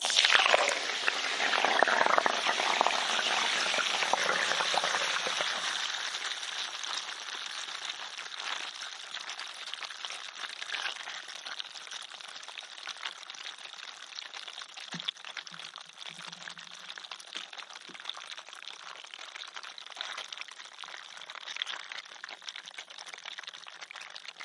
JrF D AquaSelzer test
A little test recording I did with my brand new pair of JrF Series D hydrophones.
The 'phones were placed into the bottom of a large glass, which I then filled with carbonated water.